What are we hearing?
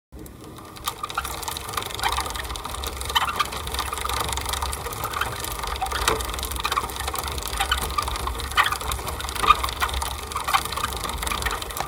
Sound of pedaling a rusty old bike